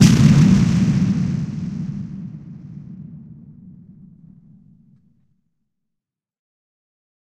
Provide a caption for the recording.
low mid afar explosion 1
an explosion, blowing very close a "vocal mic: Sennheiser MD 431", WaveLab Octavic Harmonics, Multiband EQ to cut all except low-mids, Clean Comp-WaveLab, Reverb, Reshaping envelope via WAVELAB button "V"
bomb, boom, firecrackers, fire-works, fireworks, fourth-of-july, missle, mortar, rocket, rockets